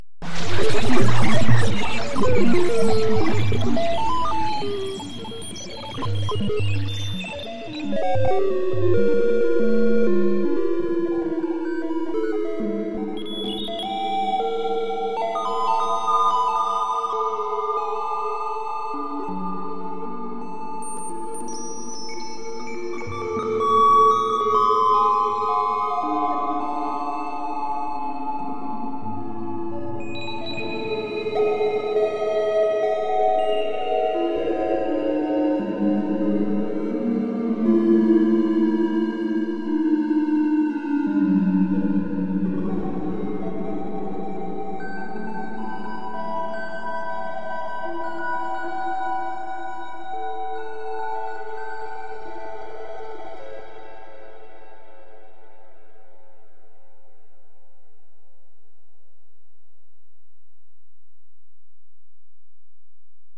Experiment with the a Resynthesizer module for VCV Rack
digital, electronic, frequency-domain, glitch, noise, random, synthesis, synthesized, synthesizer